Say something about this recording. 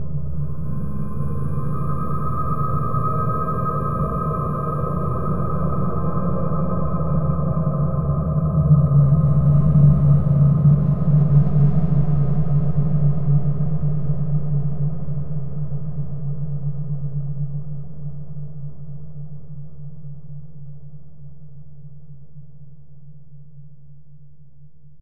Pure ambient soundscape. A little overdriven. Created using Metaphysical Function from Native Instrument's Reaktor and lots of reverb (SIR & Classic Reverb from my Powercore firewire) within Cubase SX. Normalised.
drone, deep, ambient, soundscape, space